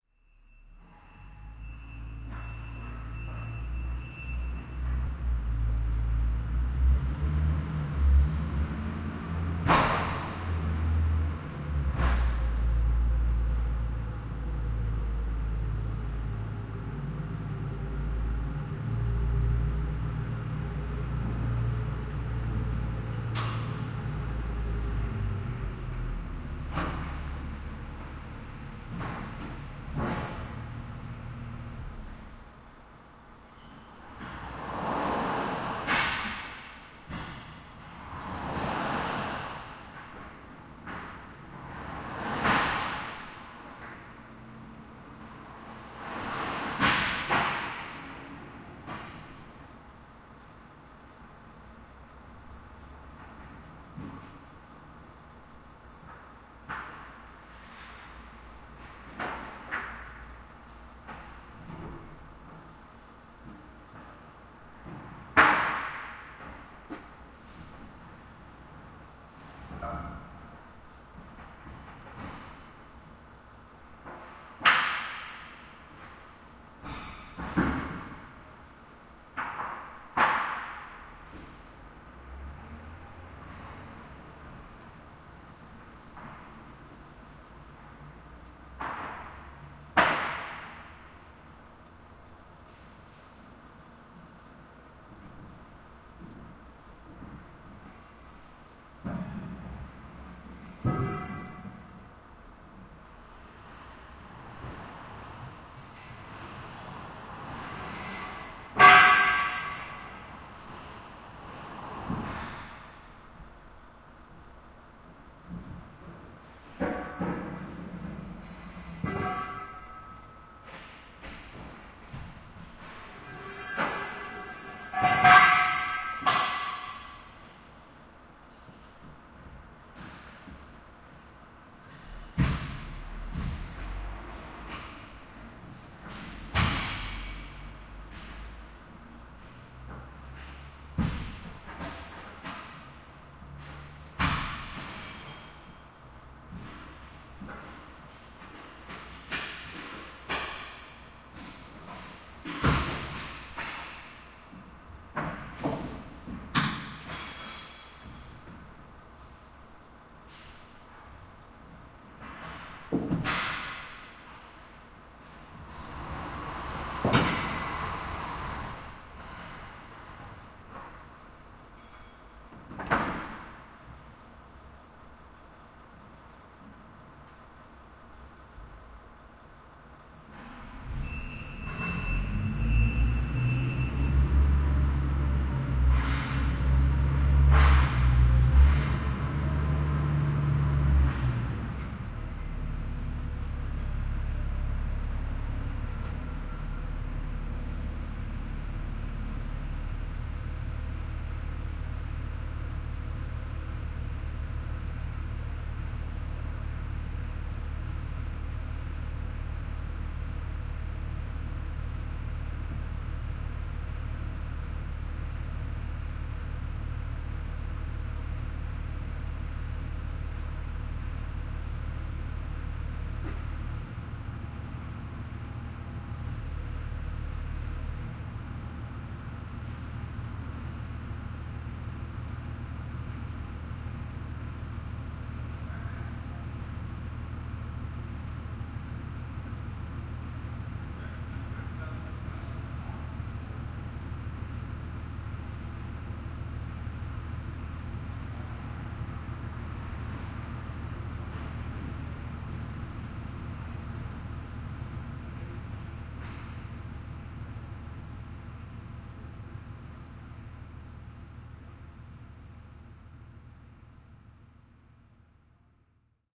110817-unload one package in kolding
17.08.2011: eighteenth day of ethnographic research about truck drivers culture. Kolding in Denmark. Unload of one package of steel: sound of forklift.